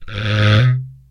skitter.thrum.02
daxophone, friction, idiophone, instrument, wood